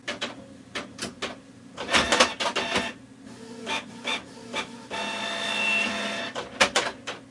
Recording of home printer (Canon MX340)
printer, printing